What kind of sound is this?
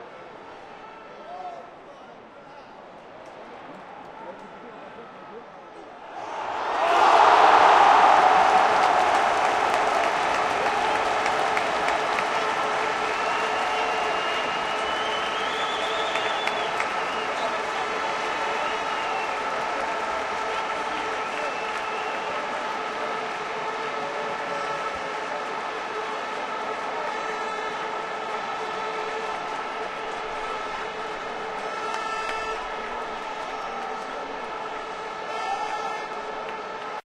Barça goal 04-2006
bara, barcelona, camp, crowd, football-match, goal, nou, stadium